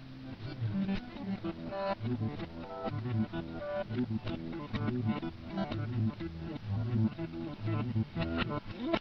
sguenkine son3
Connect a microphone. Open AUDACITY. Press Break and Register. Direct the microphone above the guitar. Apply effect reverse. Change the tempo (100%)
guitar, speed